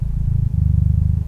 Cat MotorLoopSP17
Recording of cat motor of a Black house Cat named Spook set to loop.